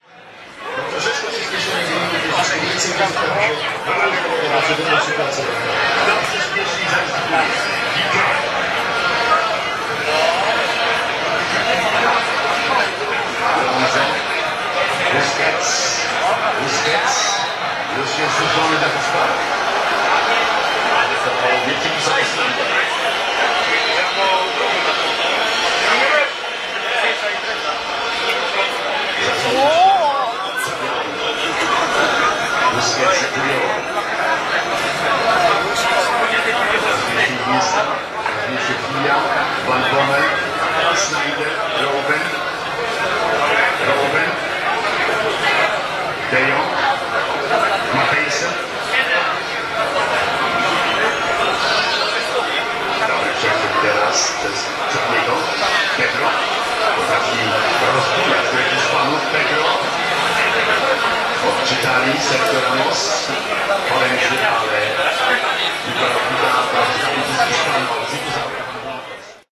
match hol spain begins110710
11.07.2010: between 20.30 -23.30. in the beer garden (outside bar) on the Polwiejska street in the center of Poznan in Poland. the transmission of the final Fifa match between Holland and Spain.
beer-garden, fans, field-recording, fifa, holland-spain-match, noise, people, poland, poznan, transmission, voices, vuvuzele